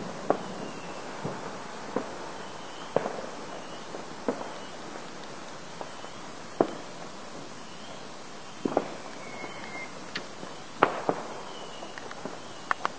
A shorter clip with some little whistly ones.
For general details see Fireworks1 in this pack.